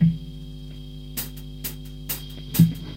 Hi-Hat Guitar Noise 6
Hi-hats and electric guitar.
Recorded with Sony TCD D10 PRO II & 2 x Sennheiser MD21U.
count-to-4, 4, intro, guitar, hihats, count-to-four, four-hits, feedback, hihat, hi-hats, hi-hat, four, 1-2-3-4, electric, 4-hits, get-ready, four-counts, 4-counts, noise